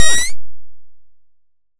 Attack Zound-34
Similar to "Attack Zound-31" but with a shorter decay. This sound was created using the Waldorf Attack VSTi within Cubase SX.
soundeffect, electronic